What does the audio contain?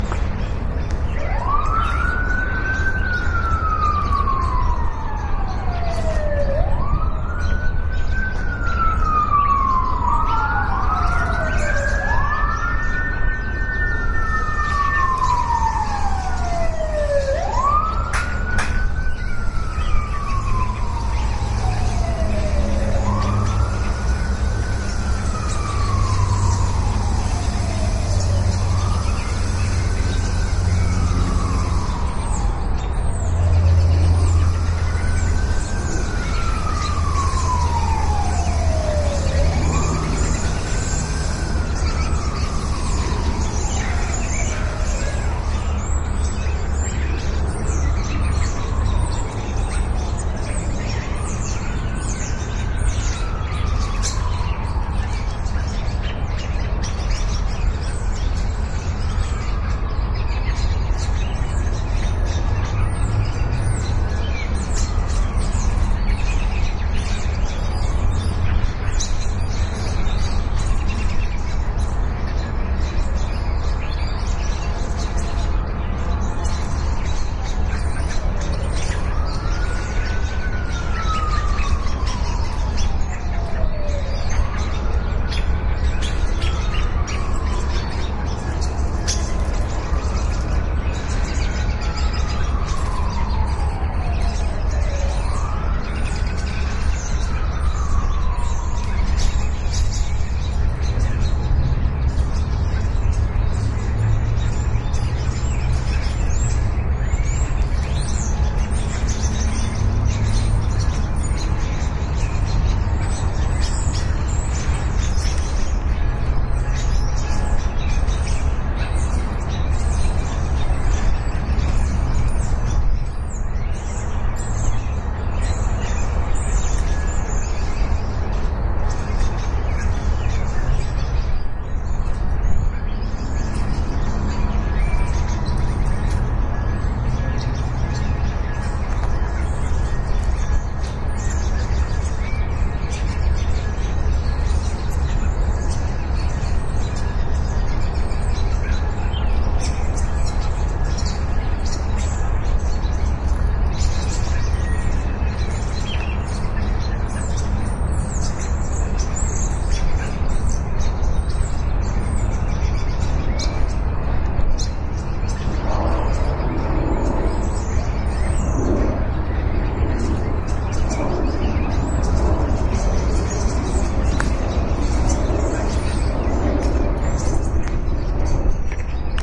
City Birds with Siren
Field recordind of birds outside my apt. There is sirens at the beginning and city noise in the back ground,
ambiance, city, birds, field-recording, city-noise, siren